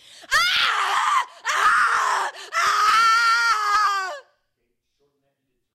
psycho screams 3
Woman screams in a state of pain delusion or psychosis
Sony ECM-99 stereo microphone to SonyMD (MZ-N707)
environmental-sounds-research, female, horror, human, scream